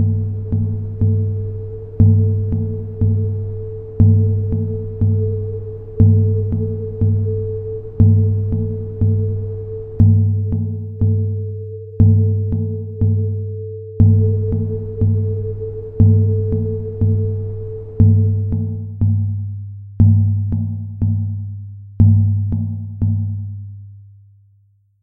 RACCA Guillaume 2019 2020 drumtunnel
I took a sinusoidal curve that I duplicated. I phased the second curve with parameters of: phases 18. I added the reverberation on the second track: reducing the treble tones to 5, the tones bass at 50 and pushing the reverb to 90. Then I added echo to both tracks. I added a noise track that I wanted to embellish to give the impression of a sound when outside. It makes you think of the sound of the air. For that, I modified the reverberation of the noise that I pushed to 85 then I modified the bass and treble sound. I changed the bass to -30 dB and treble to -25 dB. Finally I changed the output acute to 20 dB. The sound is reminiscent of a siren, an alarm outside.
I added another sinusoidal track that starts at 5 seconds, cuts at 10 seconds then returns to 15 seconds until the 19th seconds. It increases the amplitude of the sound.
I added bass rimset that add a drum effect to the sound.
air, ambiant, drum, scary, sound, tunnel, war, wind